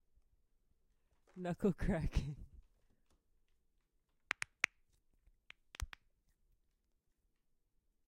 Knuckle Cracking
crack, joints, knuckle